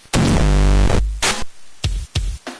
I BREAK IT YOU BUY IT !!! It's a new motto.....
Hehehehe This is a Bent DR 550 MK II YEp it is....
bending, bent, circuit, deathcore, dr550, glitch, murder, slightly, toyed